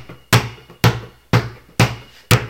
santos balon 2.5Seg 1
ball, bounce